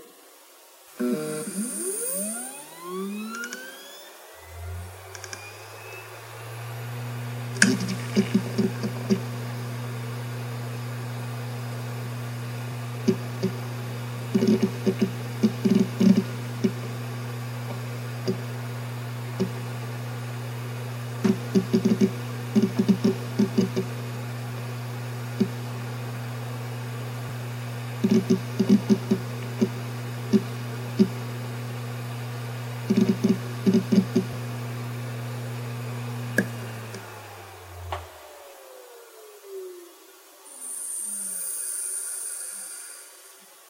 Samsung T166 - 7200rpm - FDB
A Samsung hard drive manufactured in 2007 close up; spin up, writing, spin down.
This drive has 3 platters.
(hd501lj)
motor, disk, hdd, drive, rattle, hard, machine